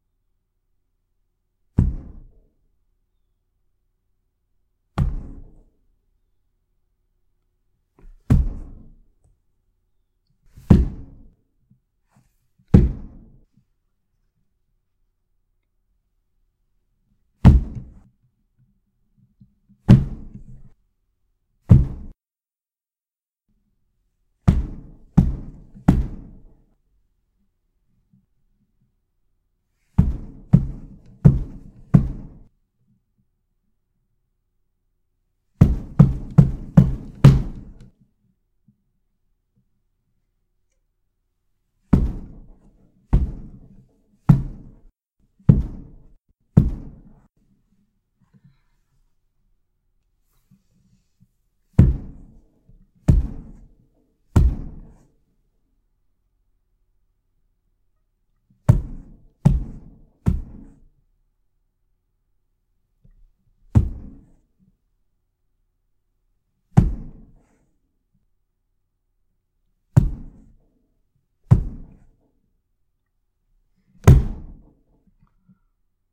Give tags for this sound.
creepy; door; heavy; horror; muffled; pound; pounding; punch; slam; slamming; stomp; wall

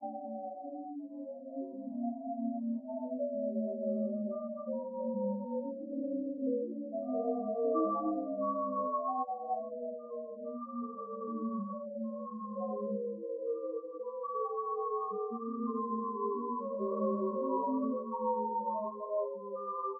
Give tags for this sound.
image; sound; space; synth

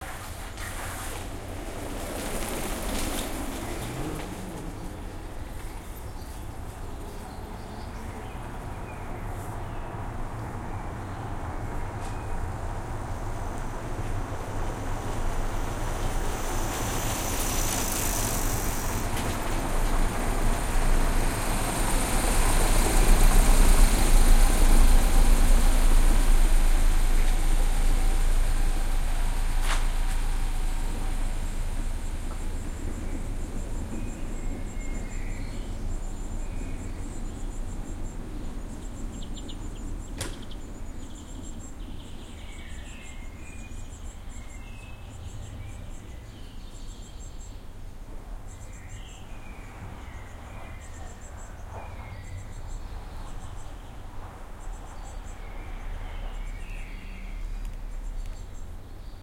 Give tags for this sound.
ambiance; ambience; ambient; bike; birds; cars; field-recording; people; soundscape; street; summer; traffic

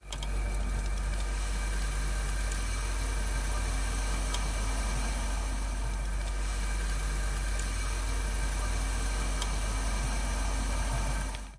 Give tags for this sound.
ice; refrigerator; frozen; refrigeratory; cold

